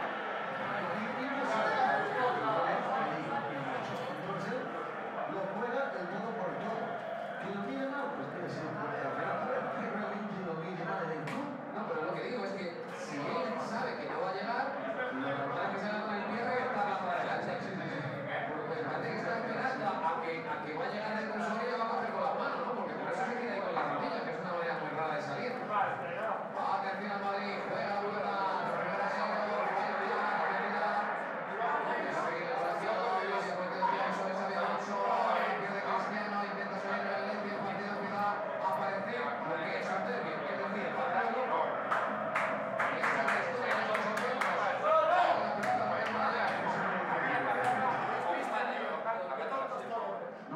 Ambiente - bar futbol 2
Environment interior bar with football on tv
MONO reccorded with Sennheiser 416
bar, football